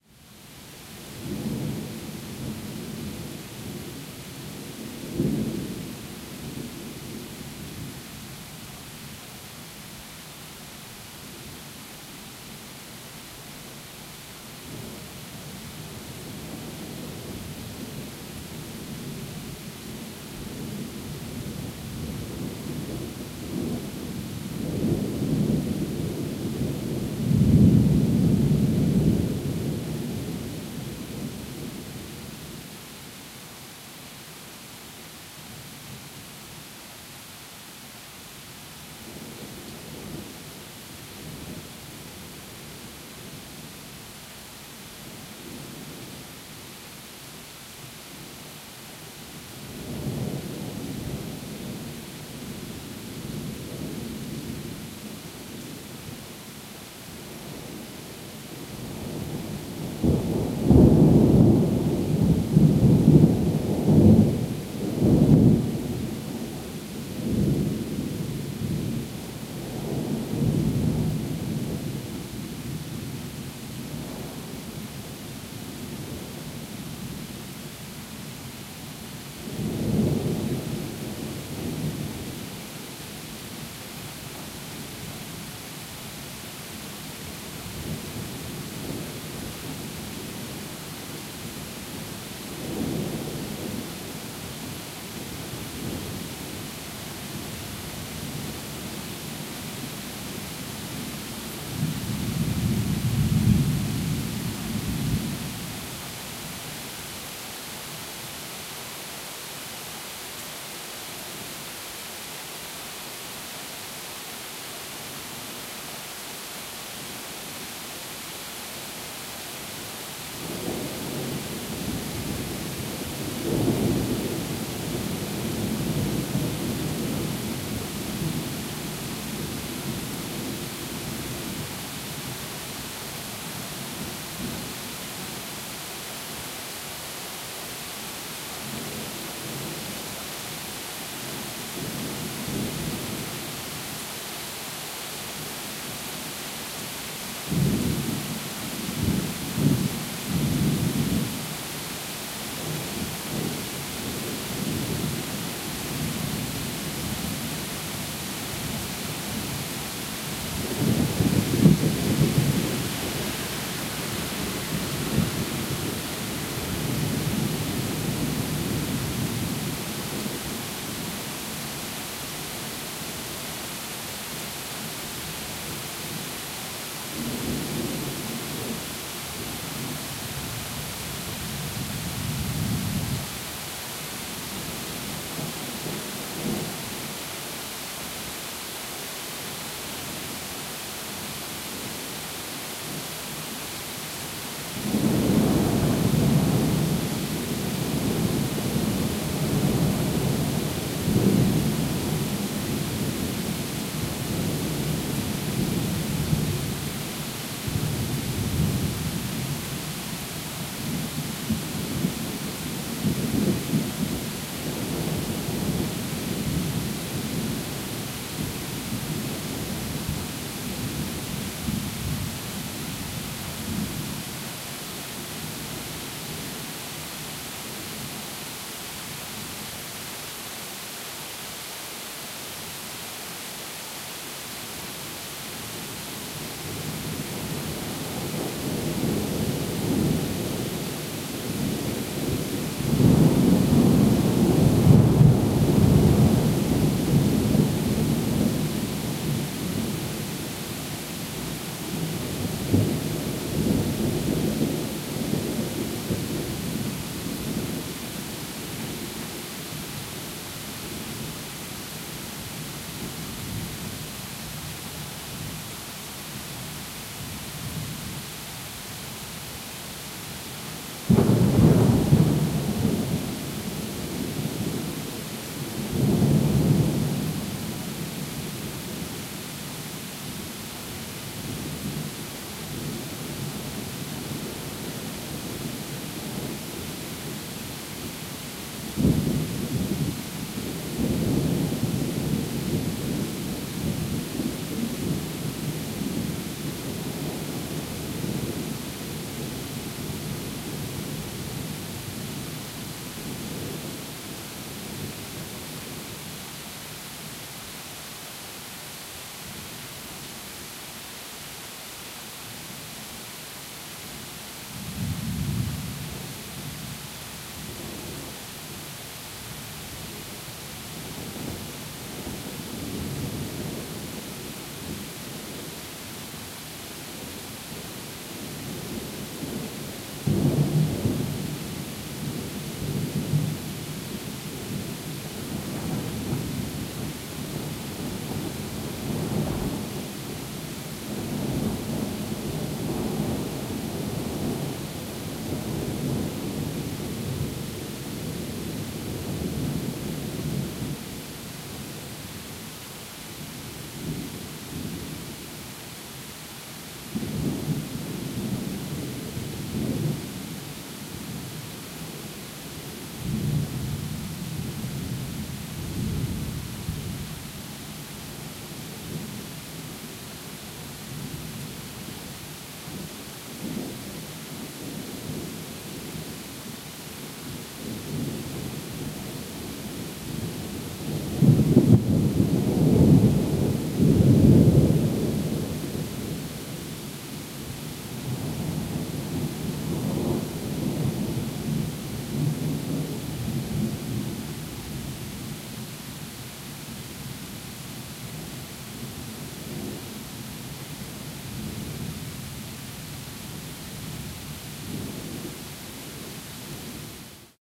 Heavy Rain and Thunder 1
Recorded during a heavy thunderstorm close to Hamburg/Germany.
atmosphere, lightning, rain, rainfall, thunder, wood